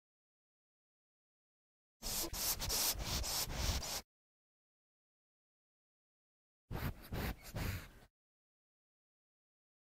Cats sniffing
Cat sniffing. Two tracks, curiously sniffing and normal sniffing. Cats sniff at the recorder.
Recorded with Zoom H6 recorder. The sound wasn't postprocessed.
Recorded close up in a little room at a shelter in Mochov. Suitable for any film.
Cat, cenichat, cuchat, CZ, Czech, Kocka, Pansk, Panska, Pet, sniffing